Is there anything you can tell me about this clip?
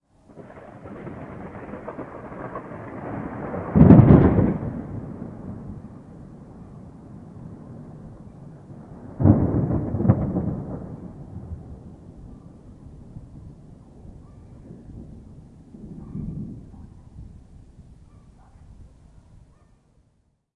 Severe thunderstorm hit Pécel on 25th of September, 2012, in the morning. I tried to record it in the back of our garden but the result was very windy. Recorded by SONY STEREO DICTAPHONE. This is the best thunder.
field-recording, lightning, rain, stereo, storm, thunder, thunderstorm, weather, wind